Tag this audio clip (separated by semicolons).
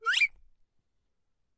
silly; whistle